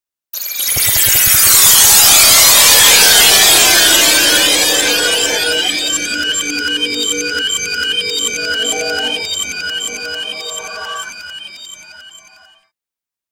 Made this with Horrorbox!
Check out Electronik Sound Lab's softwares - You can buy ESL's softwares HERE (i receive nothing)!
S/O to Electronik Sound Lab for giving me permission to publish the sounds!
Crystal Magic.
This sound can for example be used in movies, games - you name it!
If you enjoyed the sound, please STAR, COMMENT, SPREAD THE WORD!🗣 It really helps!
More content Otw!
raise-dead, halloween, necromancer, witch, wizard, magic, fantasy, anime, movie, crystal, vision, nightmare, game, film